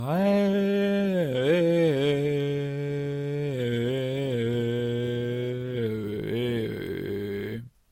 Chant1 4b 120bpm
Beatbox creative sound / loop
5 bars @ 120bpm
creative, loop, dare-19, beatbox